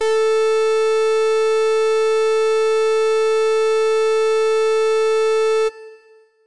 Full Brass A4
The note A in octave 4. An FM synth brass patch created in AudioSauna.